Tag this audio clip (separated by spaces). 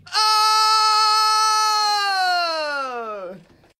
Prova 666moviescreams UPF